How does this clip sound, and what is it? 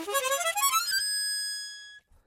A chromatic harmonica recorded in mono with my AKG C214 on my stairs.